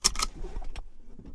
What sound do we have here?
sons cotxe tensor cinturo 2 2011-10-19
sound, field-recording, car